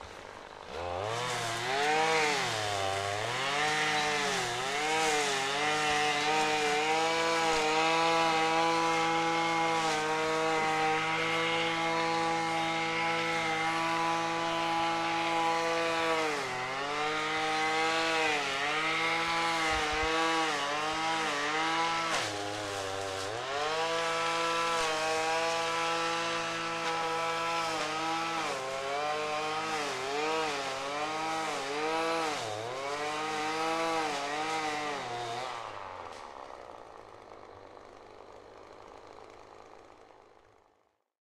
Brushcutter in action
As I walked through the woods, I heard a brushcutter, and when I got closer I recorded some of the sound.
Equipment used
Recorder Zoom H4n pro
Microphone Sennheiser shotgun MKE 600
Triton Audio FetHead(no phantom thru)
Rycote Classic-softie windscreen
Wavelab
brush, brushcutter, brush-saw, bensin, string-trimmer, graas, forestry, machine, string, saw, busch-wood, forest-machine